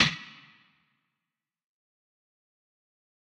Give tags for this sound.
beat
drum-hit
industrial
processed
short

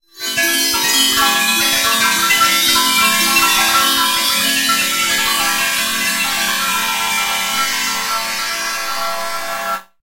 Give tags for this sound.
comb
resonant
metal
grain

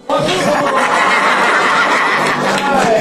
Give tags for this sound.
laughter
sound-painting
asia
vietnam

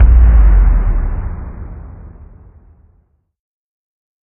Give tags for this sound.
bang
boom
detonation
drum
explosion
explosive
hit
snap
snare

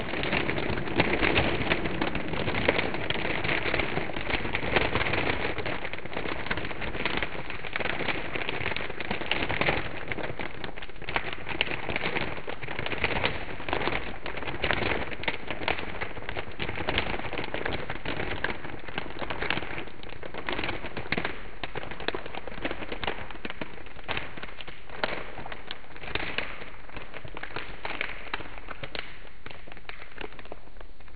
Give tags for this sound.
cellophane
fire
fireplace
mockup
simulated
simulation
weak

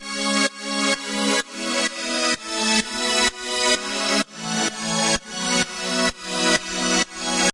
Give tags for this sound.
house
keyboard
keypad
pad
pads
techno
trance

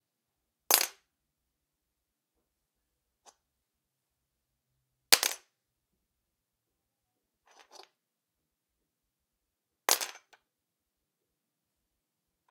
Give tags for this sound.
24
m-audio
clippers
floor
fall
gun
48
nail
pistol
falling
441
610
sennheiser

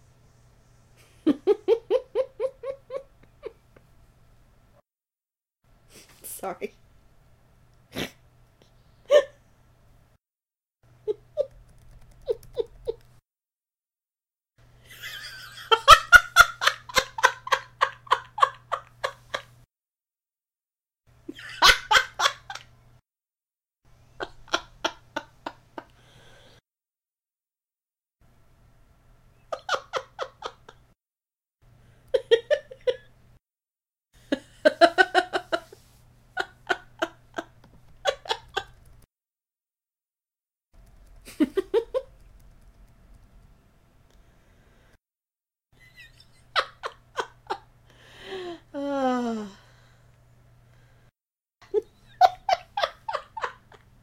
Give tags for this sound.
foley
giggle
mono
woman

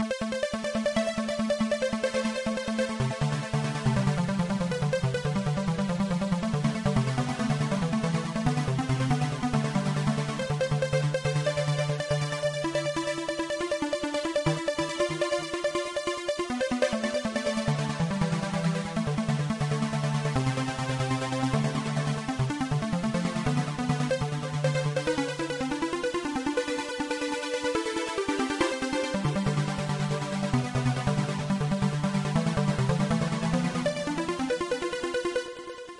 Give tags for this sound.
flstudio
loop
techno

